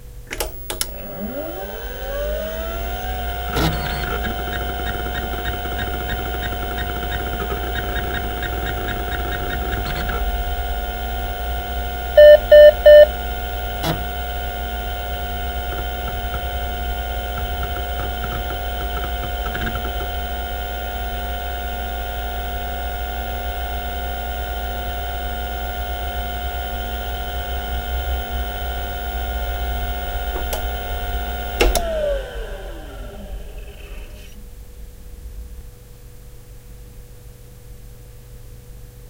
A recording of an amstrad PC1512 SD start up.
computer, startup